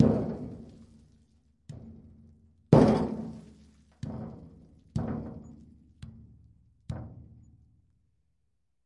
Tank of fuel oil, recorded in a castle basement in the north of france by PCM D100 Sony